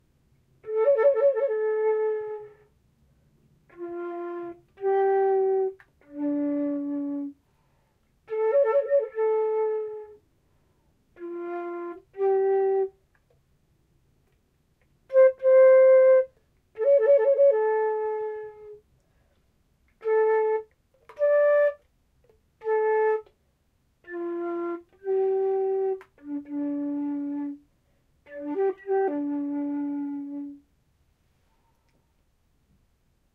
The good the bad & the ugly 02
This is take two of El Condor Pasa.
It may be slightly muffled as the recorder we used ( a Sony PCM-D50 ) had 'A Windcutter' on it, and that blocks the wind from affecting the recording, and also blocks some of the sound.
My Apologies, but enjoy!